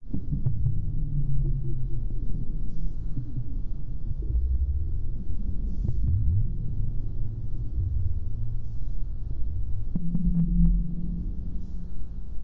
Bee Cave Ambient